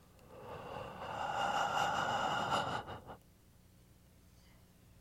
breathe in (1)
A single breath in
Recorded with AKG condenser microphone M-Audio Delta AP
air, human, breathing, breath